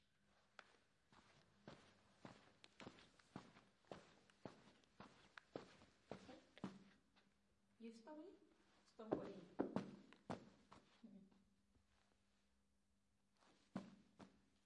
Footsteps - Carpet (Dirt) 3
footsteps carpet dirt sounds environment natural surrounding field-recording
carpet, environment, natural, sounds